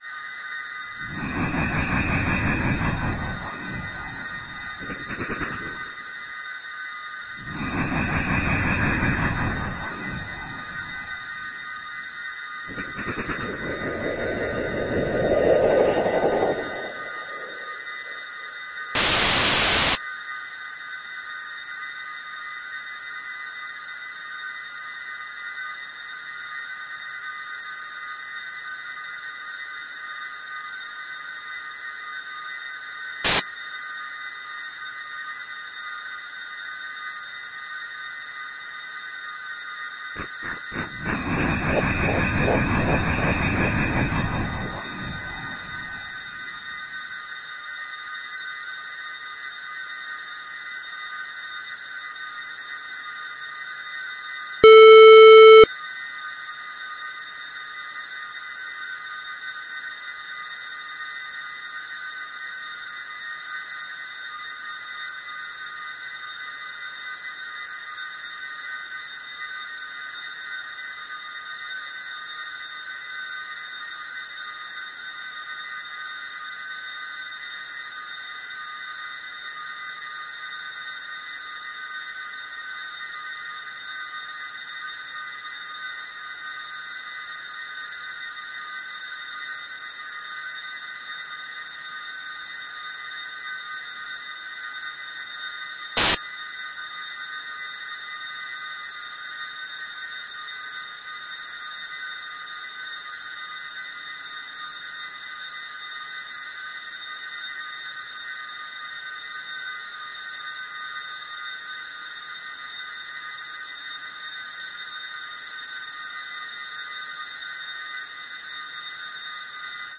S/O to parabolix for moderating this sound superfast!
For best experience, make sure you:
* Don't look at the sound waves (the sound display) at all!
* Is in a pitch-black, closed room.
* For ultra feeling, turn up the volume to 100% and set the bass to maximum if you have good speakers! Otherwise put on headphones with volume 100% (which should be high but normal gaming volume).
* Immerse yourself.
Demon's Presence
If you enjoyed the sound, please STAR, COMMENT, SPREAD THE WORD!🗣 It really helps!
horror, supernatural, foreboding, ominous, demon, malevolent, unsettling, disturbing, otherworldly, eerie, presence, creepy, evil, demonic